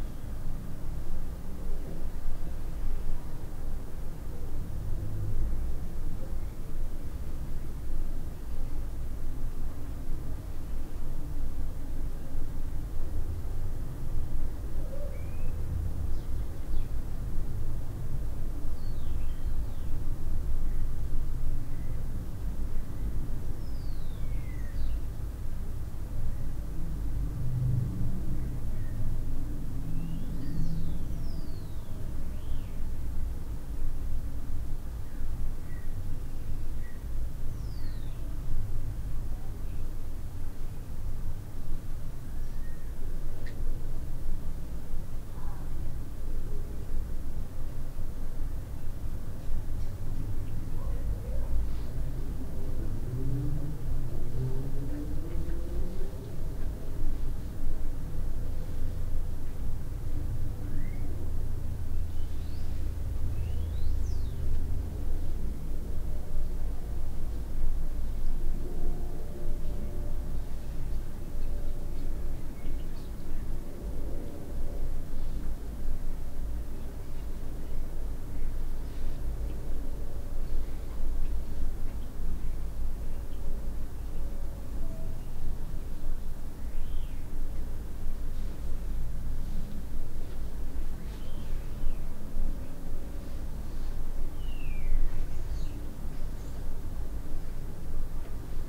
indoors room tone WC bahtroom ambient ambience distant bird
ambience, ambient, bahtroom, bird, distant, indoors, room, tone, wc